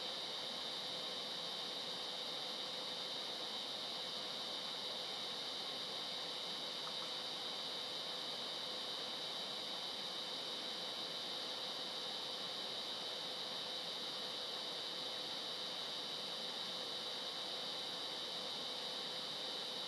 This is the motor loop of an old MSI computer. This sound has been recroded with an iPhone4s and edited with gold wave.